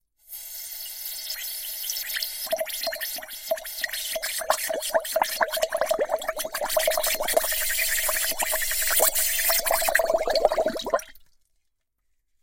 Bubble Airy Sequence
Air Can descending into glass of water